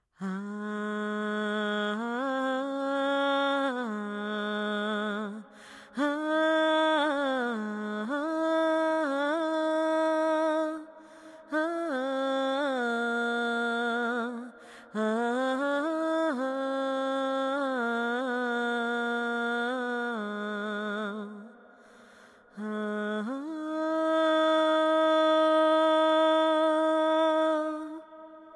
Woman Vocal Gladiator Type
woman, vocal, gladiator, vocalize